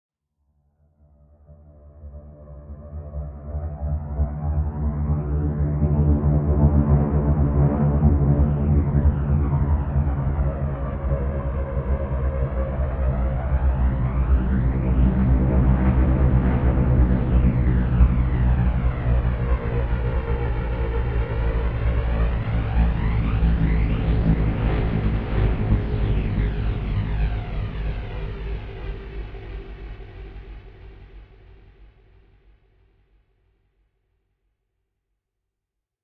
Flanger bomber
Deep sound, make think about flying bomber or spaceship, with flanger effect
machinery, mechanical, science-fiction, soundscape